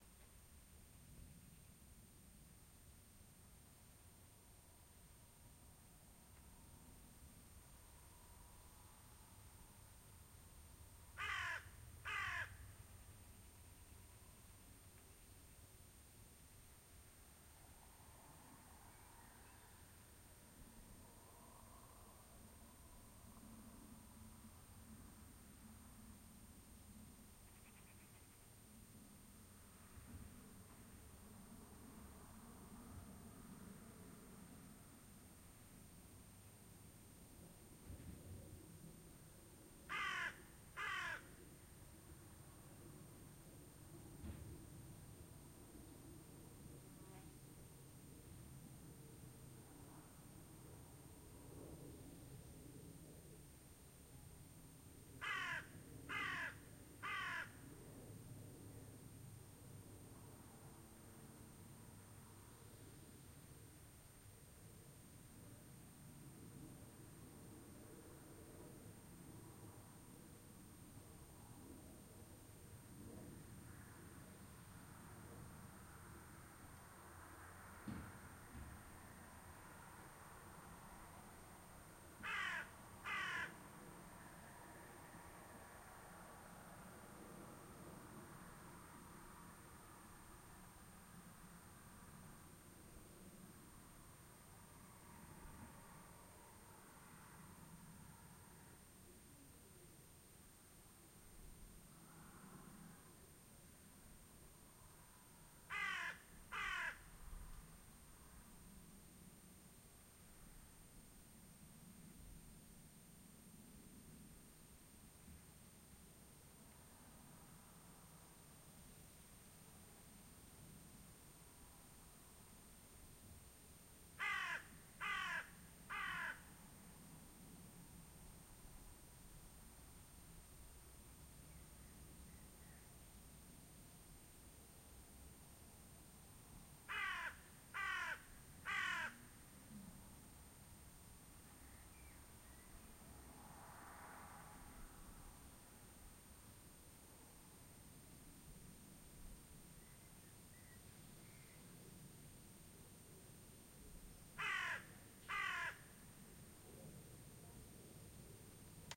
1206countryside crow
Crow (carrion crow [Corvus corone corone])calling in a very peaceful evening atmosphere near a farmhouse in the Eifel mountain region, Germany. Very distant cars and airplanes to be heard in the background, including some low noise of the farm itself. Distant song of blackbird. One fly flying by at 47 seconds. Sony ECM-MS907, Marantz PMD671.
countryside, field-recording, peace, bird-call, silence, bird, crow, nature